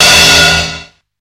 cymbal sample from Proteus FX distorted via Boss GX-700
trash, cymbal, distortion, fx, crash, distorted, drum, sample, tube, proteus, beat